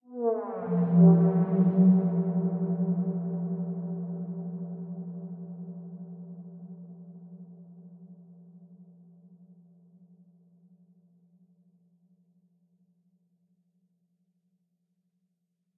Some space "wind". Synthesized sound made in Harmor.